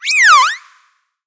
Moon Fauna - 136
Some synthetic animal vocalizations for you. Hop on your pitch bend wheel and make them even stranger. Distort them and freak out your neighbors.
alien, sfx, sci-fi, fauna, animal, synthetic, sound-effect, vocalization, creature